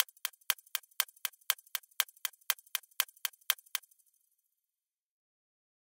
Ticking Stopwatch (dry)
Created in Logic 9 using Ultrabeat, Space Designer, and EQ.